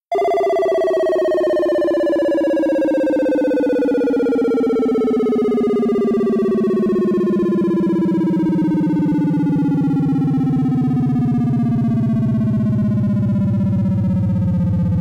Use it to accompany graphics such as a percentage sign or health meter that is draining. This is the slow version. Made using Reason.